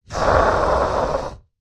A large Monster voice created using layers at different pitches, and formant variation.
beast, beasts, creature, creatures, creepy, growl, growls, horror, monster, noises, processed, scary